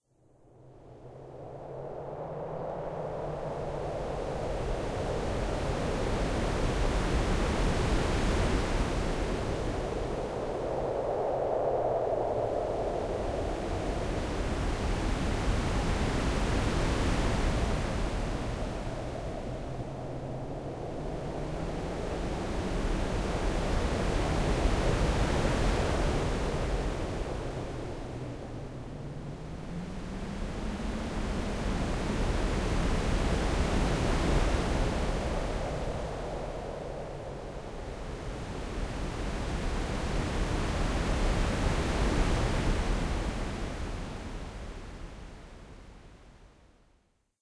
A sample that sounds like this wind rushing by on a very breezy day at the beach. I created this using FabFilter Twin 2 after a session exploring the different XLFO's and filters of this amazing Synth.